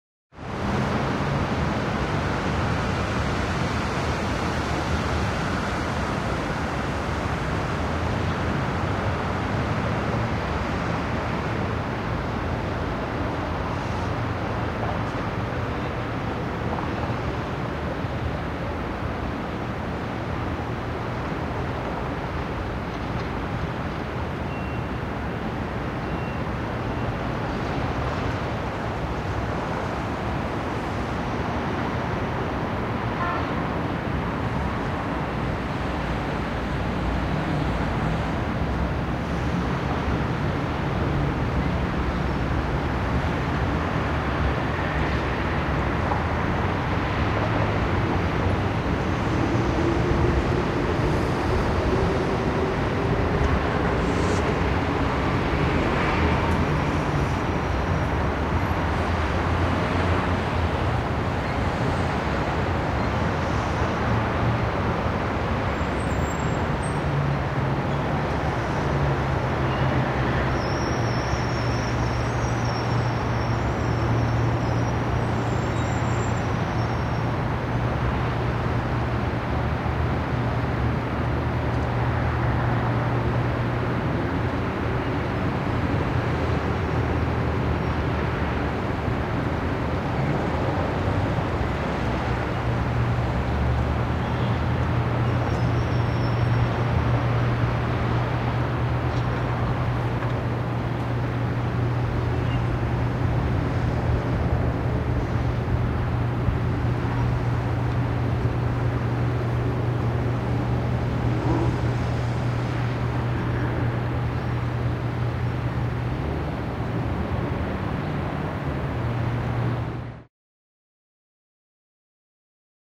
som exterior cidade
som exterior de uma cidade